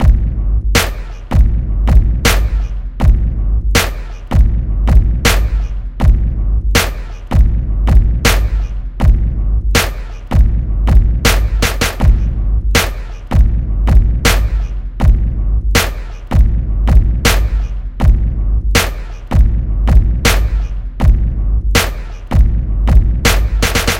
Area 51 Alien Drum Loop Bpm 80 - Nova Sound
51, 80, Alien, Area, Bpm, Dance, Drum, EDM, FX, House, Kick, Loop, Nova, NovaSound, Propellerheads, Sound, Space